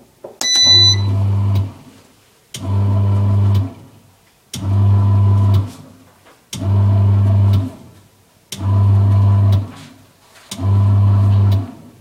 bread-maker
Starting a bread maker, which then kneads six times for one second, then stops.
appliance, beep, bread-maker, bret, electric, knead, machine, maker, motor